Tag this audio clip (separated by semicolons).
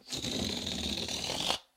Balloon; inflating; inflate